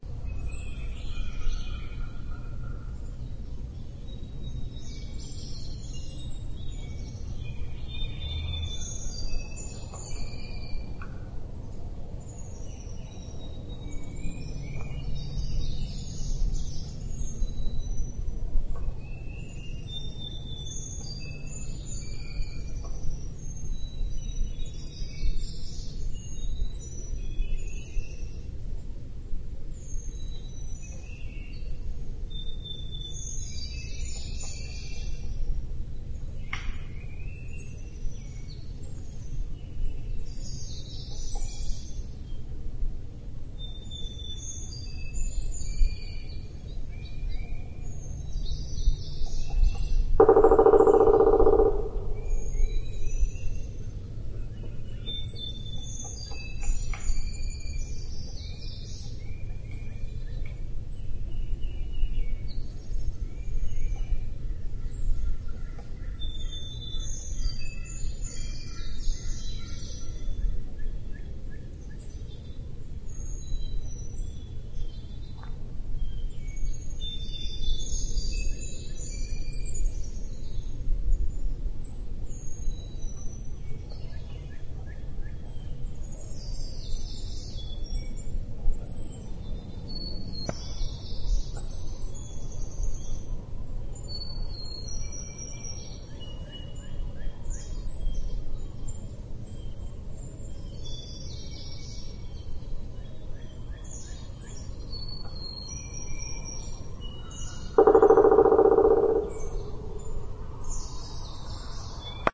Birds & Woodpecker in Flat Rock Jun06
Birds and woodpecker in the hills of North Carolina.
birds, woodpecker